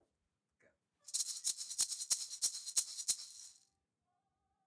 some maracas being shaken.
foley, maracas